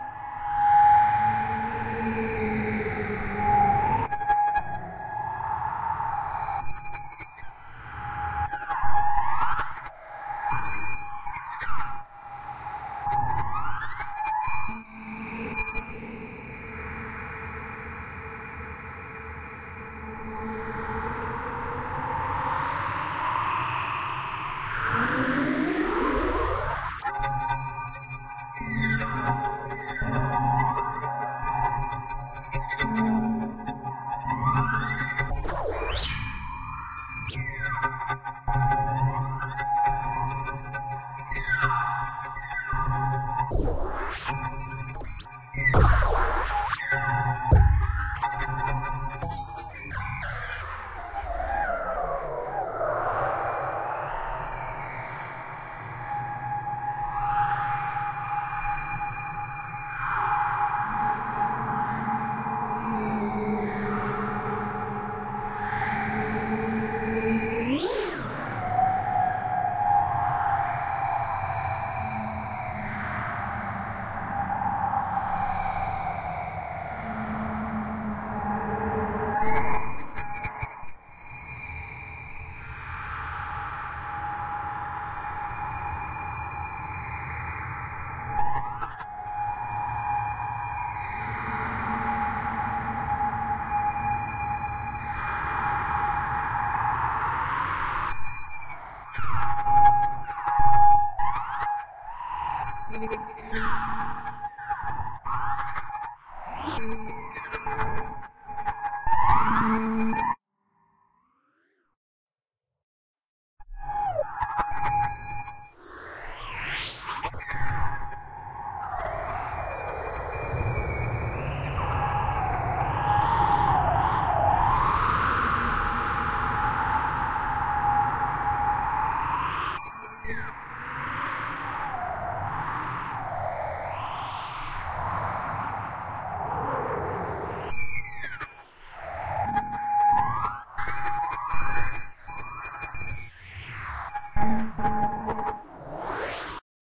Space Radio Interference
Eery distant radio chatter from outer space. Or creepy haunting voices from another realm. Or... whatever comes to your mind.
Made with Reaktor 6, TG-8H by lazyfish, Output Movement, Eventide Blackhole Reverb. Processed with Ozone 4 in Logic Pro X.
science-fiction, futuristic, radio, between, demons, good, space, sci, science, ufo, alien, battle, fi, tech, epic, harry, wind, magic, potter, chatter, aliens, fiction, voice, sci-fi, evil